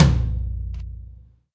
A low pitched, lo-fi, very fat sounding drum kit perfect for funk, hip-hop or experimental compositions.
funky, phat, stereo, lo-fi, drums, drum